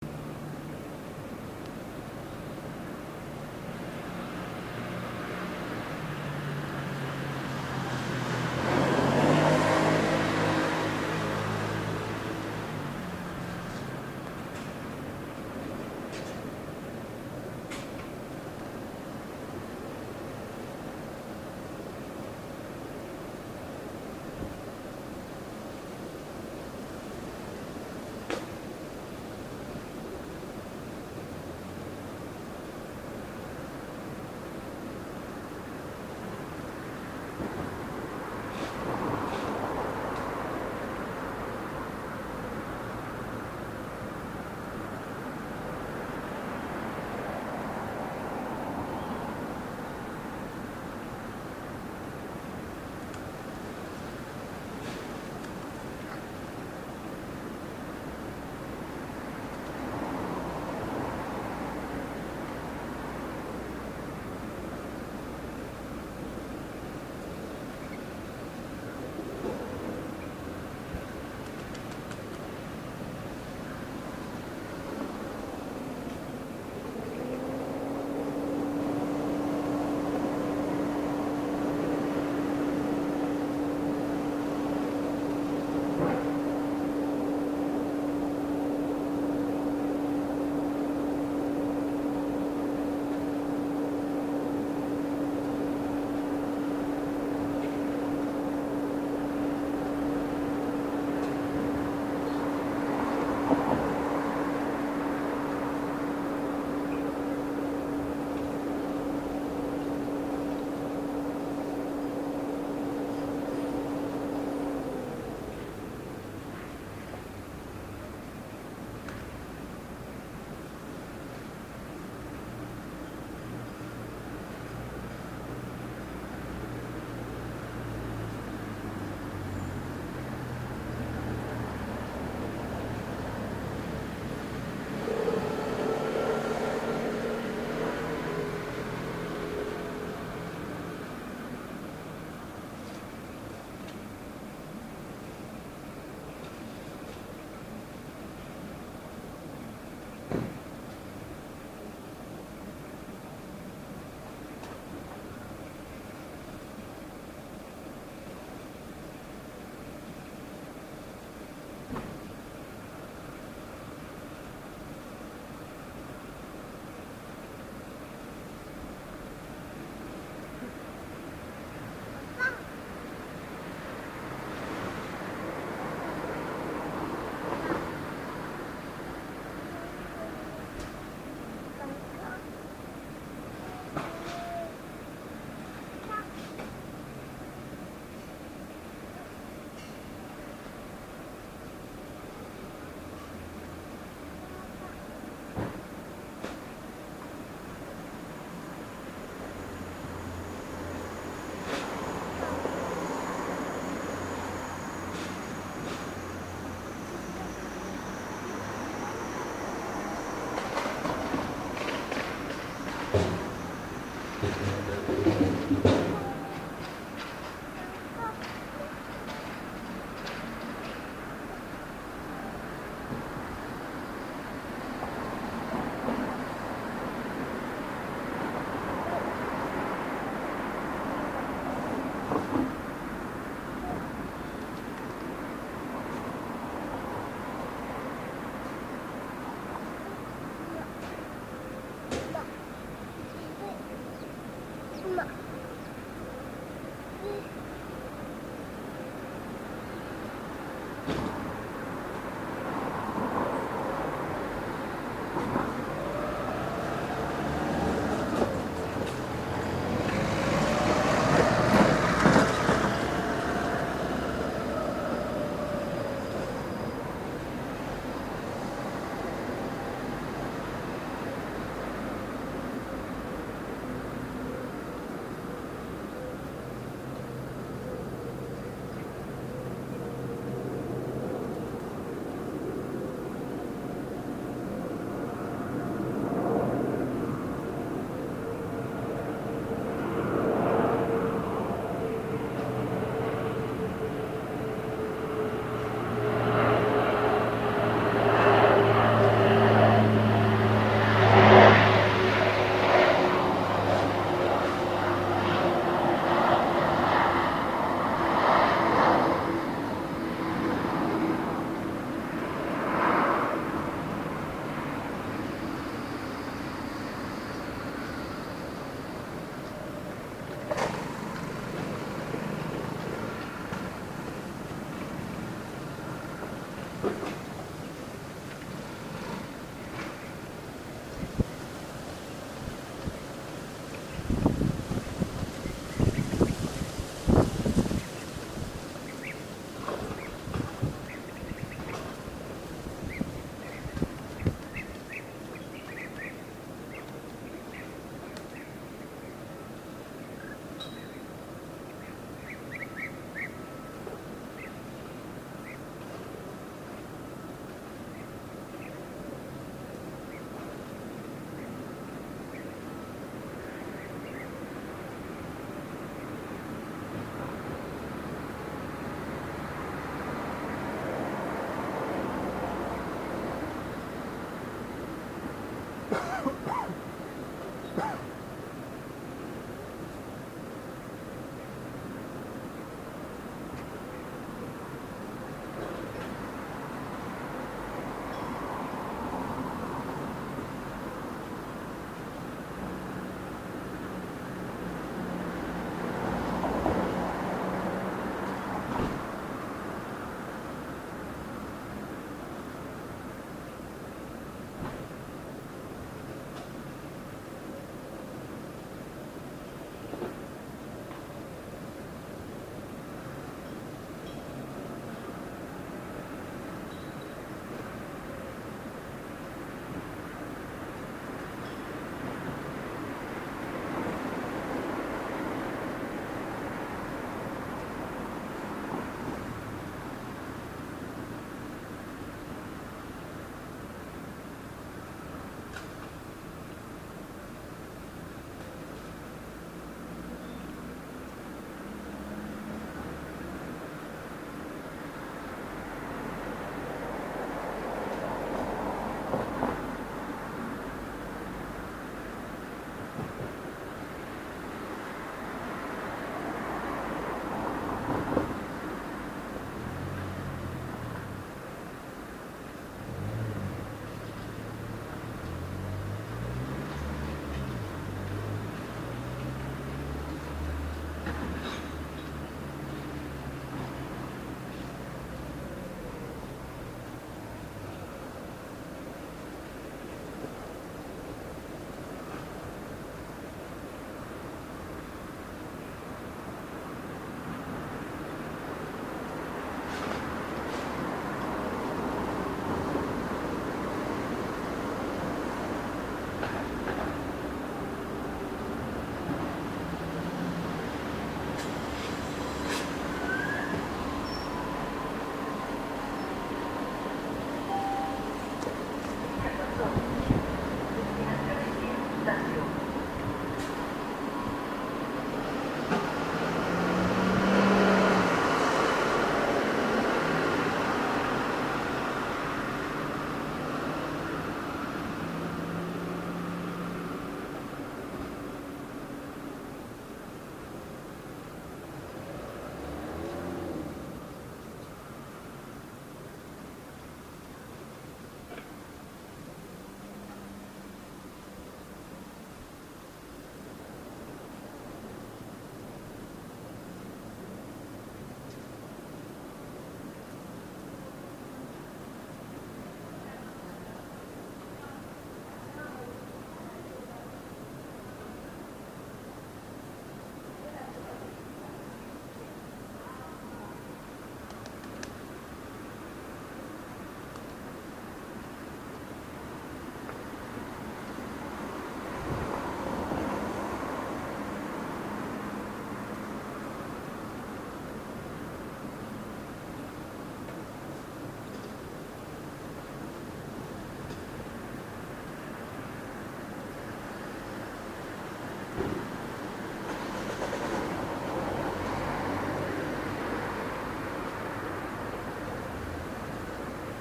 Sound of the city
Sounds of city of Pisa (Italy) 31/08/2017.
Cras, motorbikes, people, an aeroplane, pigeons, and then so...
cars, soundscape, italy